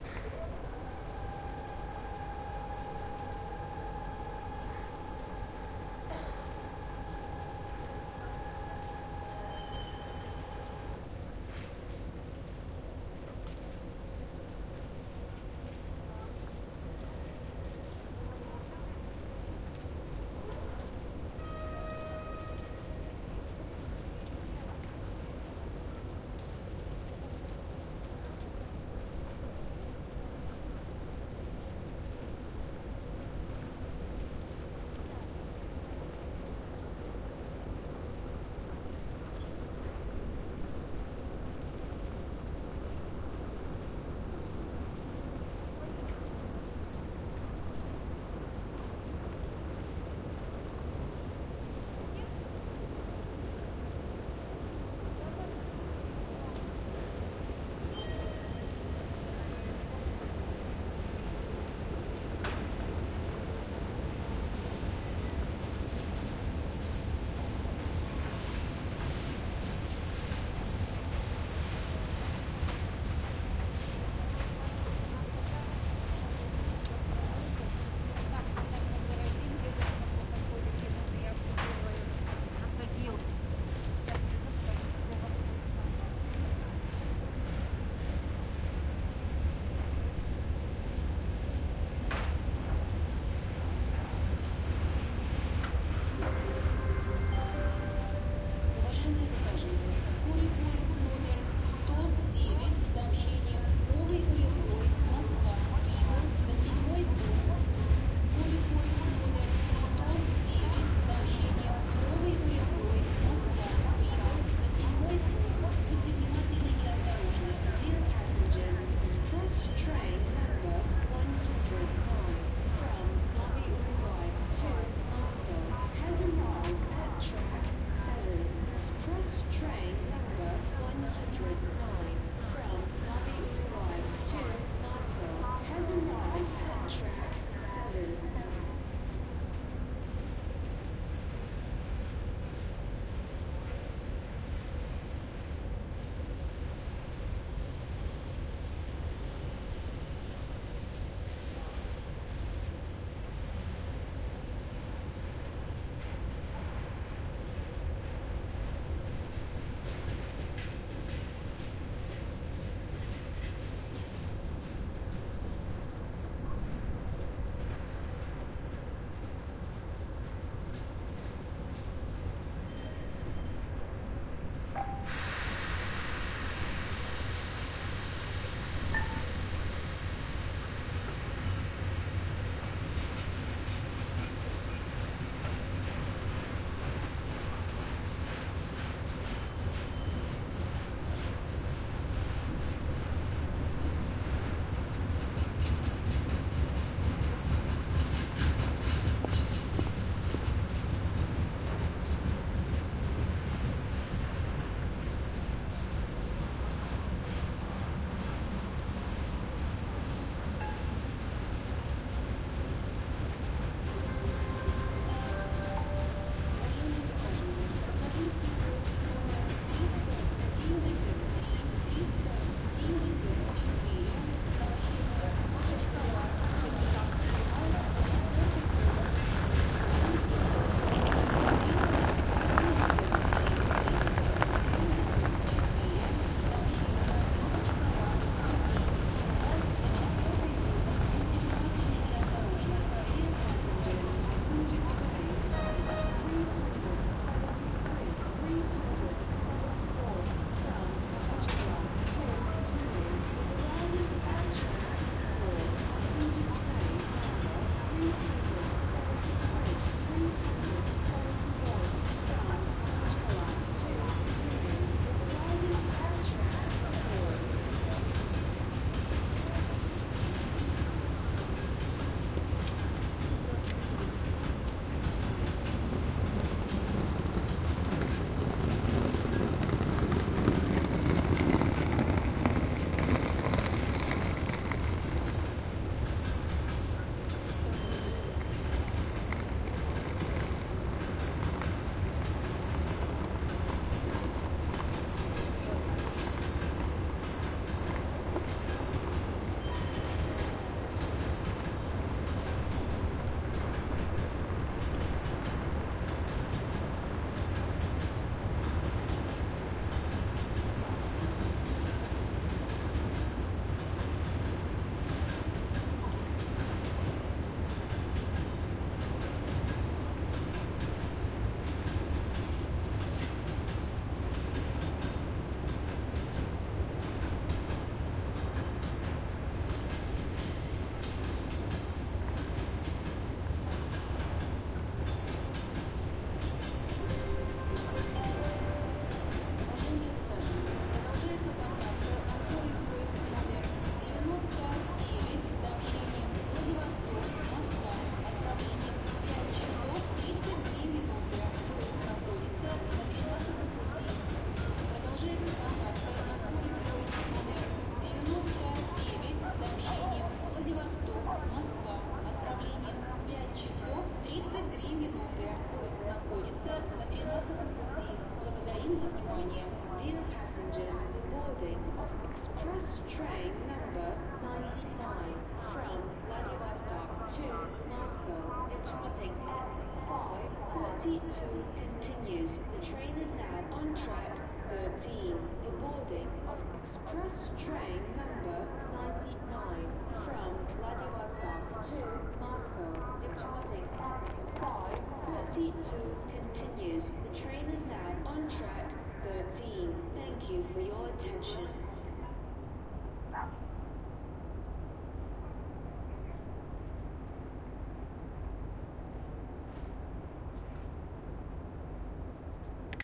Distant train rattle at a station. Omsk
On platform. Station ambient and passing train rattle. An announcement in English is heard (concerning train 109). Break checks in the distance and the emptying of the wagon gray water container. Recorded with Tascam DR-40.
announcement, break-check, field-recording, omsk, railway-station, train